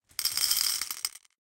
Bag of marbles poured into a small Pyrex bowl. Glassy, granular sound. Close miked with Rode NT-5s in X-Y configuration. Trimmed, DC removed, and normalized to -6 dB.
bowl, glass, marbles, pour